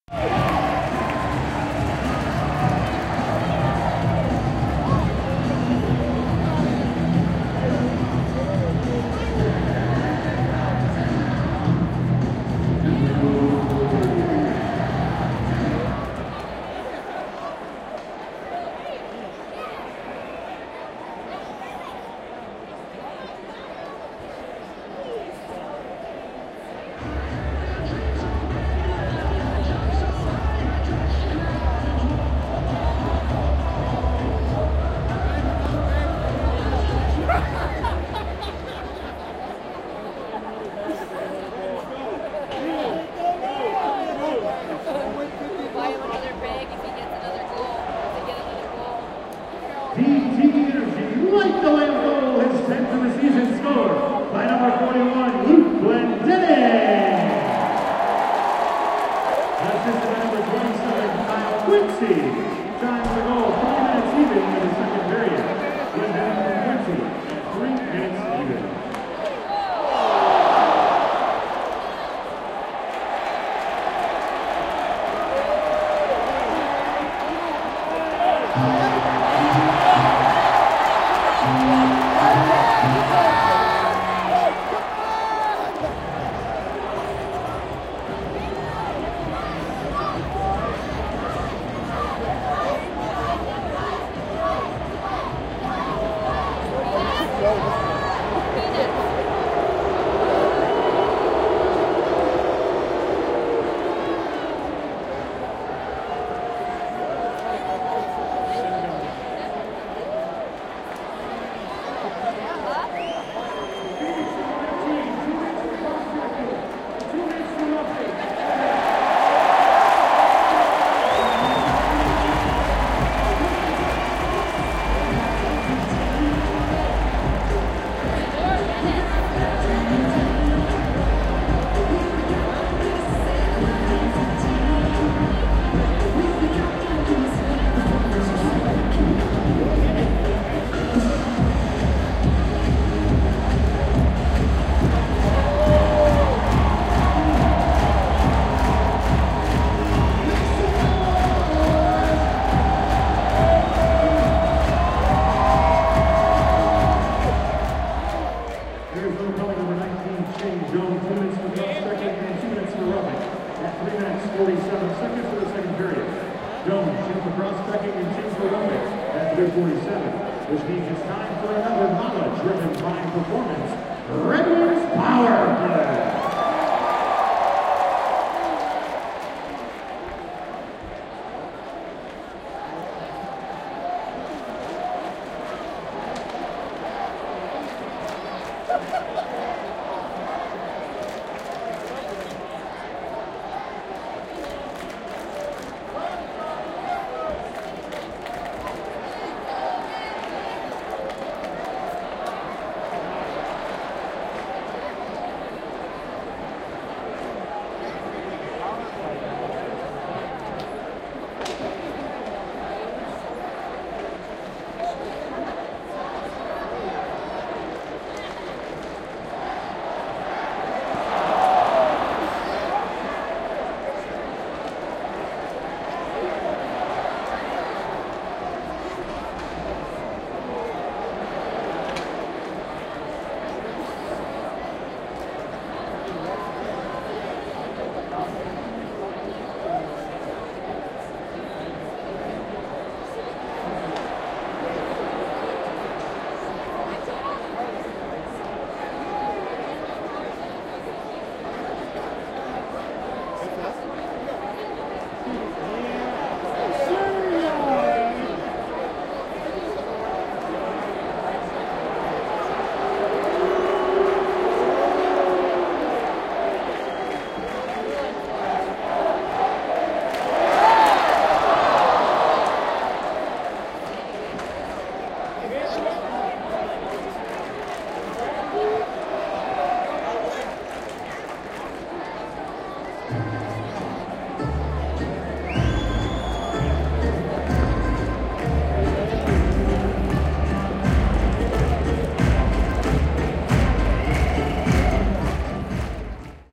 Ice hockey match announcement and crowd sounds
Announcements and audience sounds at an ice hockey match in the Joe Louis Arena, Detroit. With incidental music. Recorded from high up at the back of the arena seating.